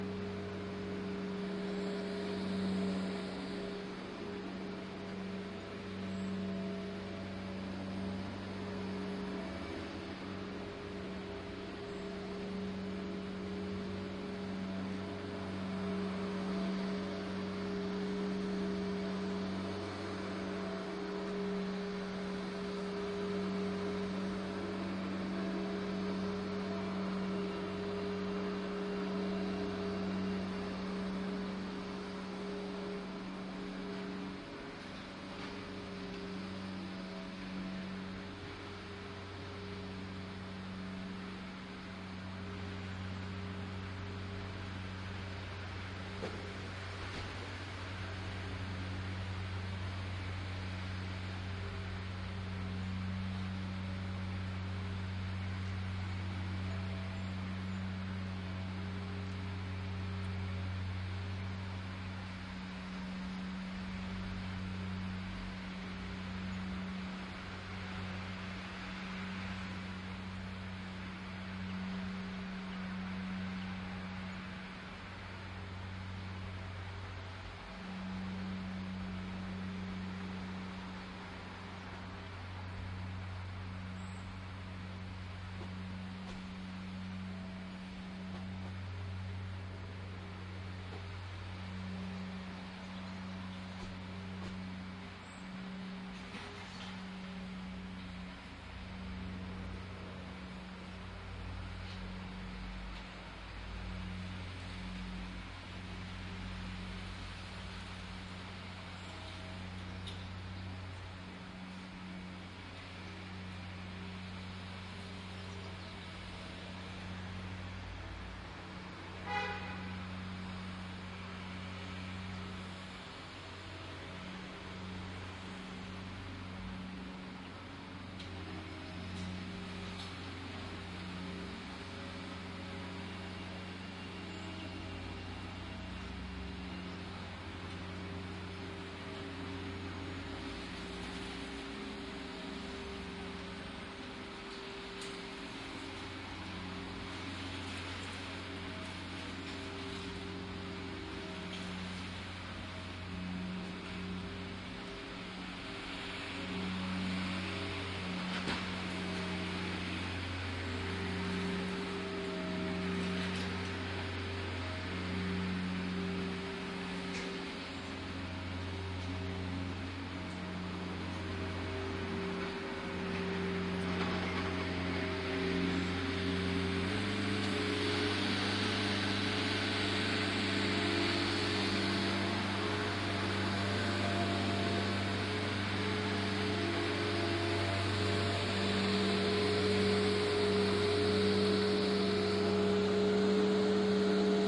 The Greyfriars' monastery, founded in 1460, stood on the present Greyfriars'
cemetery in the city of Perth in Scotland. The cemetery itself was used
up to, say a hundred years ago, and is a great place for a break.
This recording was done, when the grass was cut there on a day in July 2007, using the Sony HiMD MiniDisc Recorder MZ-NH 1 in the PCM mode and binaural microphones.